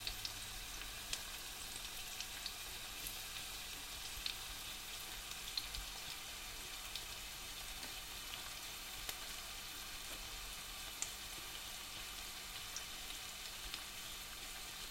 10 seconds - frying pan sizzling - clean sound